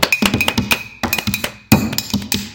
For my first sound I wanted to create a musical one inspired by the spirit of how musical film star to dance and sing.
To create this effect I recorded hand clapping from my classmate Sonia and tapped my pen on my mug.
I put the two sounds on top of each other.
I used the reverberation effect once on the hands and twice for the mug.
I made a distortion on the mug and I phased the hands then I ended up using a fade out for the hands in order to be able to listen to the sound to infinity without shocking the ear.